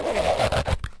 zoom H4.
pulling the yoga mat with my hand and letting it slip.
mat, squeak